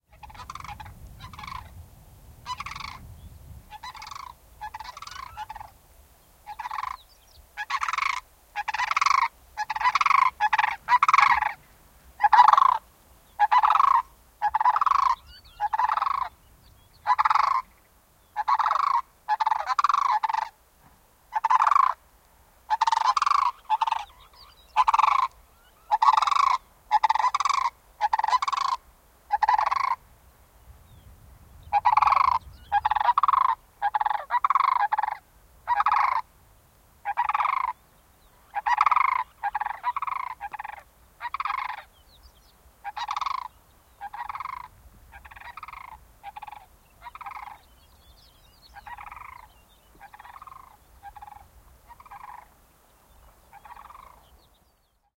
Kurki, ylilento / Crane, two cranes fly above crying, some small birds in the bg
Kaksi kurkea ääntelee lentäessään yli. Lopussa kuuluu myös pikkulintuja.
Paikka/Place: Kanada / Canada / Baker Lake
Aika/Date: 18.06.1986
Bird, Birds, Crane, Field-Recording, Finnish-Broadcasting-Company, Flypast, Kurjet, Kurki, Lento, Linnut, Nature, Soundfx, Tehosteet, Yle, Yleisradio